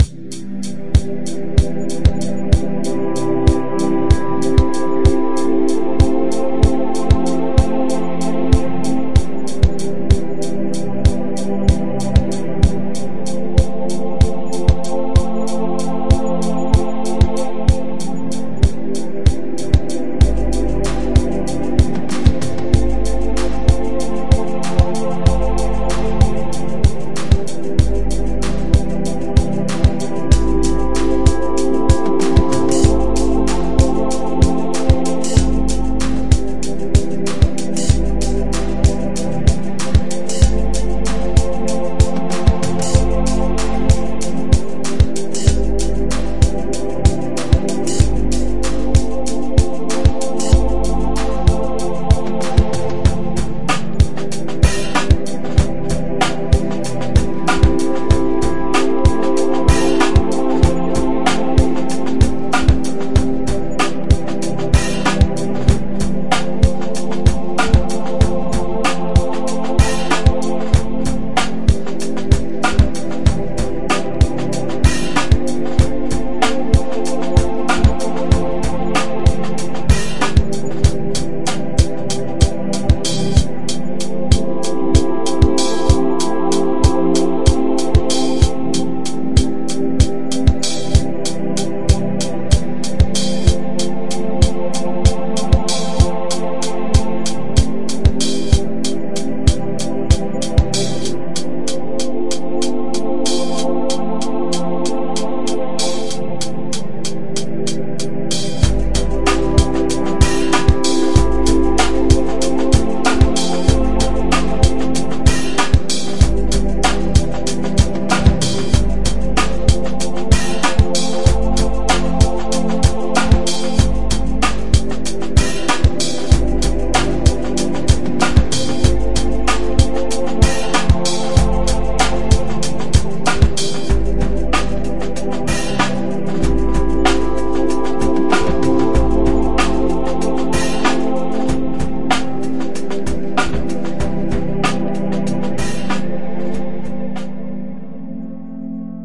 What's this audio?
chill vibe
some nice loop made in abelton.
soul, 130-bpm, quantized, beats, funky, hiphop, drum-loop, percussion-loop, cleaner, rubbish, chill, drums, groovy